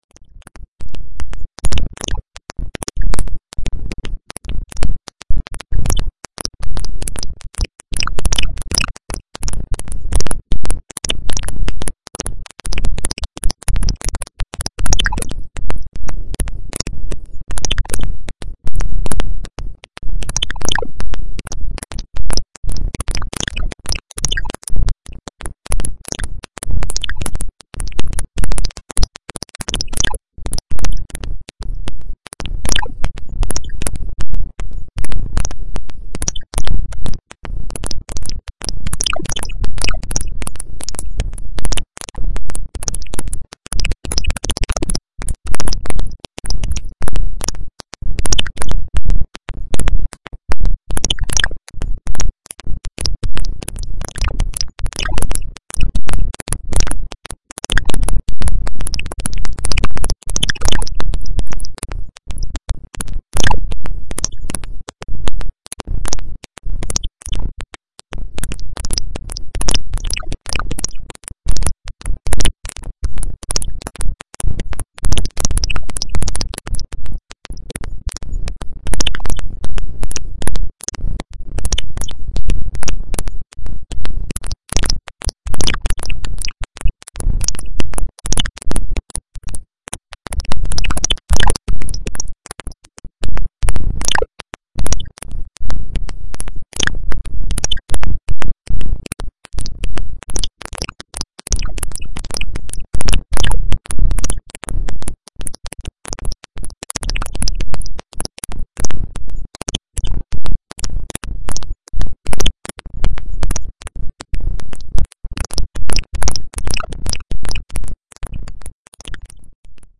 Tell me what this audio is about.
Noise Garden 06
1.This sample is part of the "Noise Garden" sample pack. 2 minutes of pure ambient droning noisescape. Clicky glitchy noise.
drone, effect, electronic, noise, reaktor, soundscape